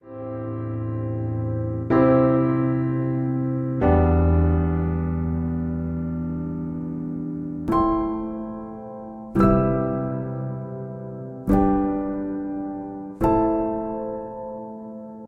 Slowly Piano Melody
atmosphere, beautiful, chord, Melody, music, musical, Piano, Slowly